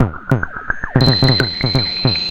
tweaknology rising-up02
made with black retangle (Reaktor ensemble) this is part of a pack of short cuts from the same session
noise, glitch, cyborg, mutant, computer, effect, transformers, soundesign, robot, analog, lab, fx, sci-fi, digital, hi-tech, soundeffect, electro